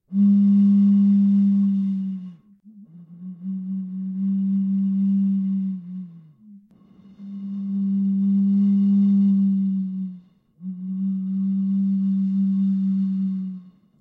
Pop Bottle Whistles 1
Blowing on a plastic bottle.
blow, bottle, plastic, pop, soda, toot, whistle